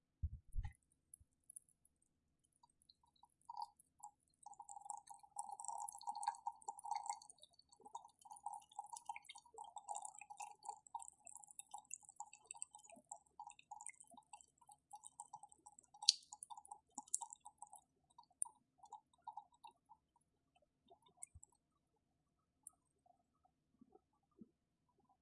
Liquid Down a Facebowl Drain (Noise Reduced)
This is a small amount of liquid being poured into a drain about the size of a silver dollar.
The original recorded captured the apartment's heating system. I used noise reduction, then normalized the waveform.
bathroom, face-bowl, liquid, trickle, water